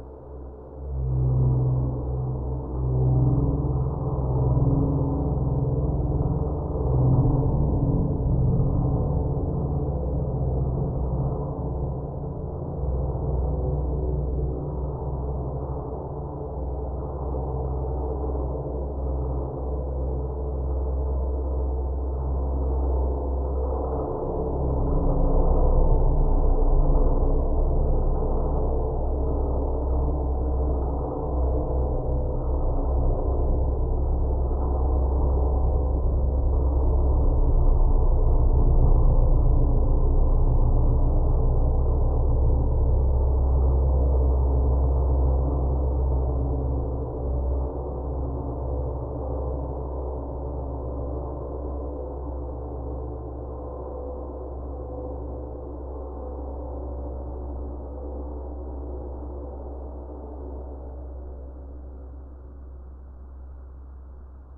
cymbal lo03
A few very strange tracks, from a down-pitched cymbal.
processed, strange, noise, cymbal, low, ambience, scream, horror